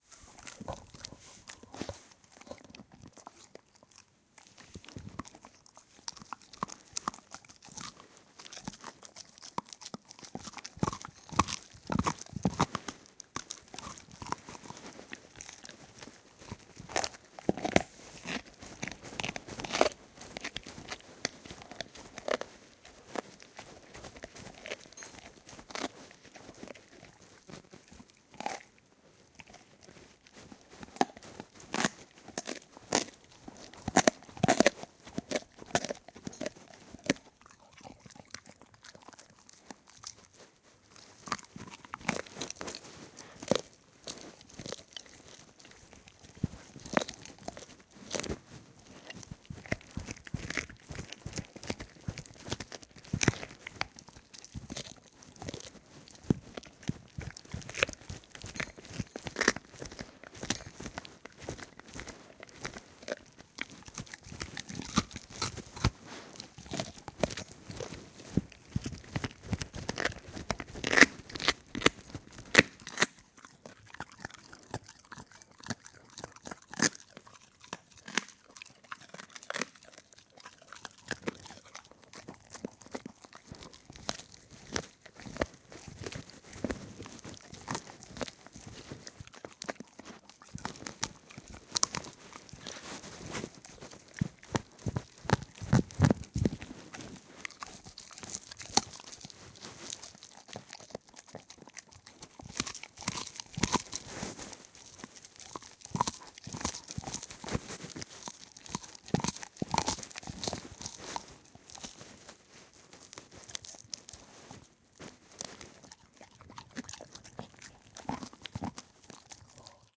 Dog gnawing a bone
My dog is gnawing a big bone in his bed. Recorded with my iPhone + Tascam iM2 mic. Very close perspective.
eating, meat, close, chew, zombie, flesh, bones, chewing, Dog, bone, perspective, gnawing